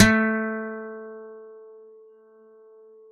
A 1-shot sample taken of a Yamaha Eterna classical acoustic guitar, recorded with a CAD E100 microphone.
Notes for samples in this pack:
Included are both finger-plucked note performances, and fingered fret noise effects. The note performances are from various fret positions across the playing range of the instrument. Each position has 5 velocity layers per note.
Naming conventions for note samples is as follows:
GtrClass-[fret position]f,[string number]s([MIDI note number])~v[velocity number 1-5]
Fret positions with the designation [N#] indicate "negative fret", which are samples of the low E string detuned down in relation to their open standard-tuned (unfretted) note.
The note performance samples contain a crossfade-looped region at the end of each file. Just enable looping, set the sample player's sustain parameter to 0% and use the decay and/or release parameter to fade the
sample out as needed.
Loop regions are as follows:
[200,000-249,999]:
GtrClass-N5f,6s(35)